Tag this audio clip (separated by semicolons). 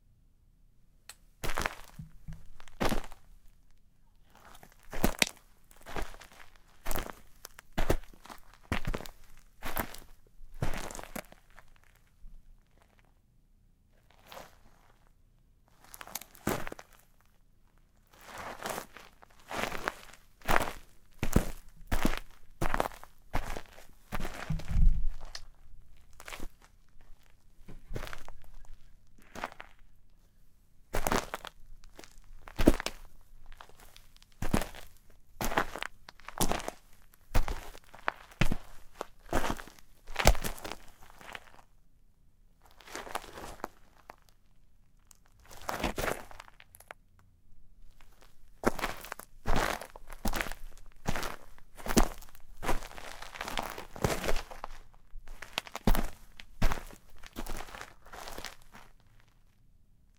boots; dirt; footsteps; gravel; scuff; shoes; short; stop; walk